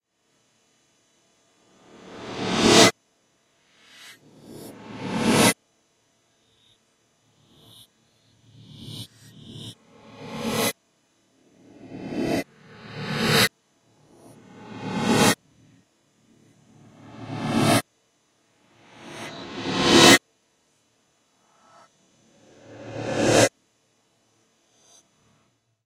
Reverse Reverb Impact
A recording of impact sounds processed with reverb and then reversed to create cinematic swooshes.
cinematic, hard, impact, reverb, reverse, transition, whoosh